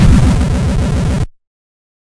A retro video game explosion sfx.